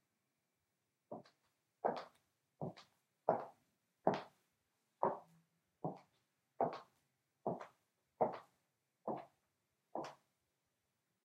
Steps in high heels. Walking in high heels.
steps, walking, hills, caminar, tacones, women, heels, walk, mujer, high, footsteps, step